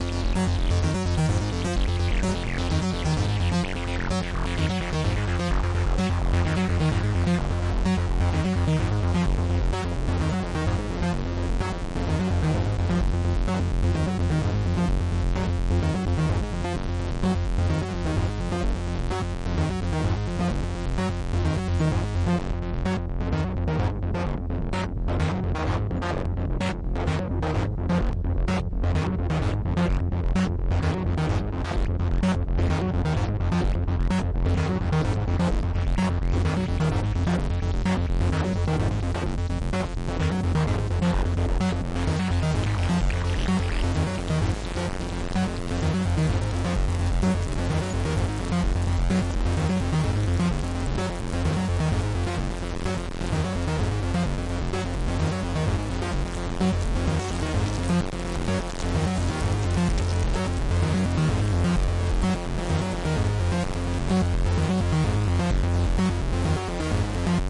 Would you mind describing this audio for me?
Arturia Acid BASS BUS
loop, club, electronic, minimal, dance, synth, techno, Fm, electro, glitch, 128, analog, trance, arturia, bass, edm, minibrute, house, rave, acid